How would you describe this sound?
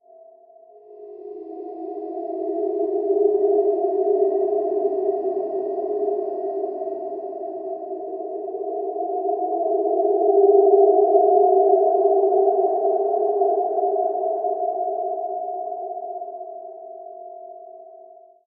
LAYERS 004 - 2 Phase Space Explorer is an extensive multisample package containing 73 samples covering C0 till C6. The key name is included in the sample name. The sound of 2 Phase Space Explorer is all in the name: an intergalactic space soundscape. It was created using Kontakt 3 within Cubase and a lot of convolution.